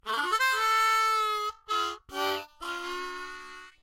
Harmonica Rift Ending 01
This is a rift I came up with to end a song. Played on a Marine Band harmonica key of g